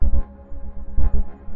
Industrial Strings Loop 006
Some industrial and metallic string-inspired sounds made with Tension from Live.
dark-ambient, industrial, metallic, strings